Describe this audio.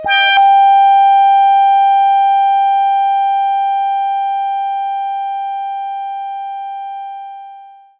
PPG 014 Sustained Organwave G#5
This sample is part of the "PPG
MULTISAMPLE 014 Sustained Organwave" sample pack. The sound is similar
to an organ sound, but at the start there is a strange attack
phenomenon which makes the whole sound weird. In the sample pack there
are 16 samples evenly spread across 5 octaves (C1 till C6). The note in
the sample name (C, E or G#) does not indicate the pitch of the sound
but the key on my keyboard. The sound was created on the Waldorf PPG VSTi. After that normalising and fades where applied within Cubase SX & Wavelab.